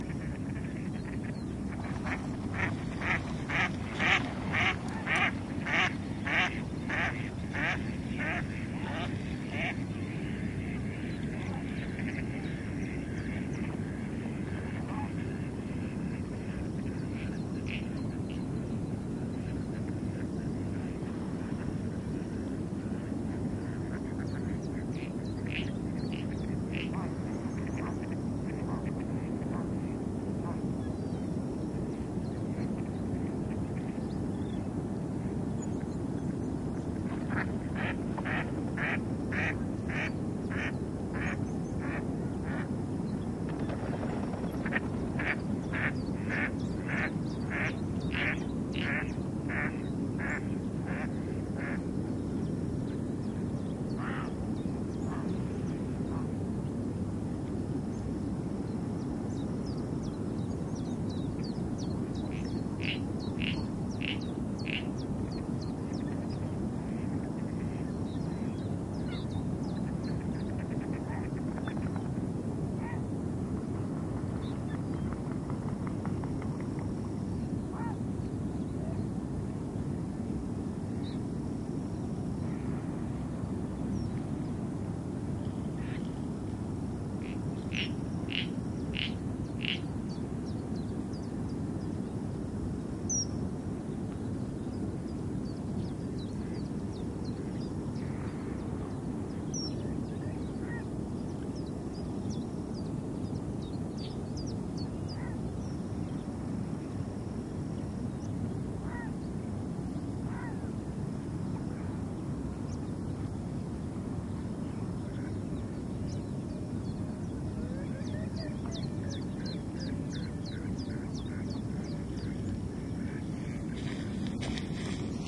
20100124.casa.bomba
bird calls, rumble from water flowing from a huge pump in background. Sennheiser MKH60 + MKH30 into Shure FP24, Olympus LS10 recorder. Decoded to mid side stereo with free Voxengo plugin. Recorded near Casa Bomba, Donana, Spain.
ambiance, birds, field-recording, marsh, nature, south-spain